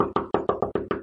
A sound of "followed thuds", recorded with a very simple microphone and edited to be cleaner.
door, followed, hit, impact, plack, plock, thud, thuds, thump